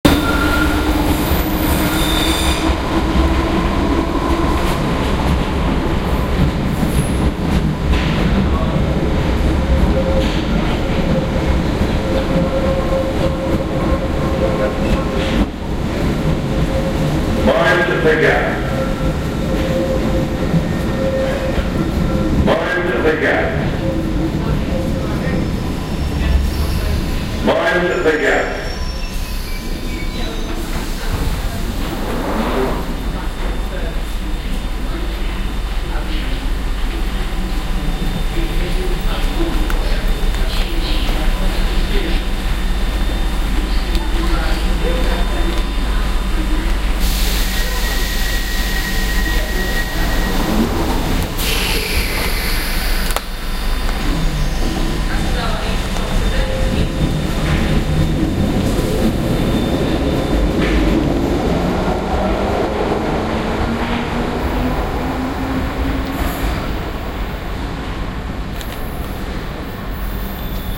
Monument - Mind the gap